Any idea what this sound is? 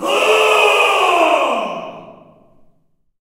Male screaming lowly in a reverberant hall.
Recorded with:
Zoom H4n